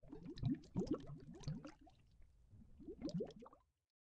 Water Subsurface Bubbles
Bubbles, foley, low, tone, water